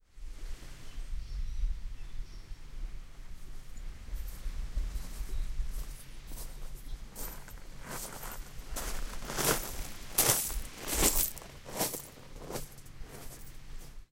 Coral Waves Walking
Walking on dead coral along the beach on Fitzroy Island near the Great Barrier Reef. October 2014
Great-Barrier-Reef, waves